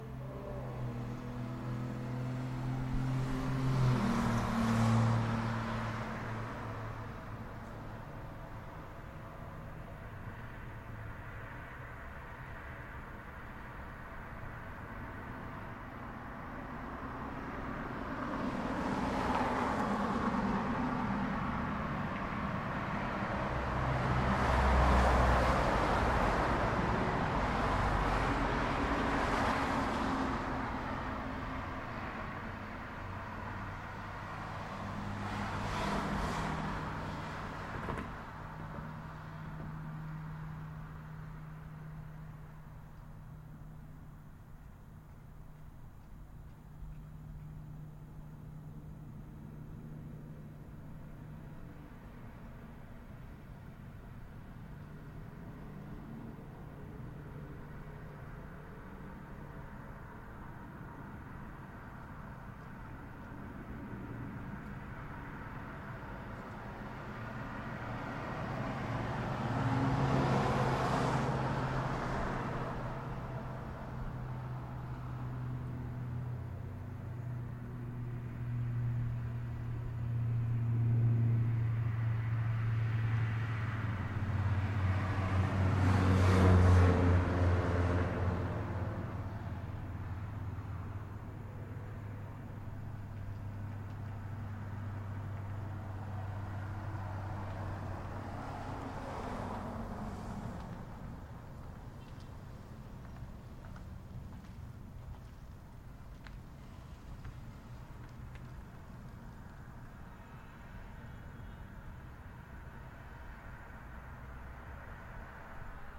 Street noise recording with a shot gun mic